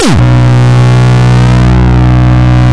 gabba long 001
distortion, gabba, kick